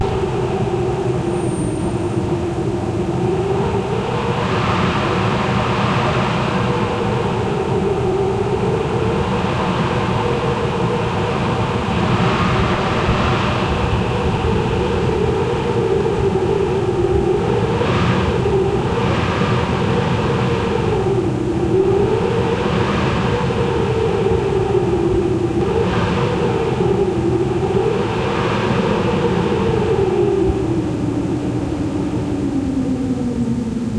A sample of some high winds captured on an early spring day using a Marantz PMD620 digital audio recorder, and an Audio-technica PRO24 stereo mic. Compression, EQ and bass boost added to clean up the sound a little.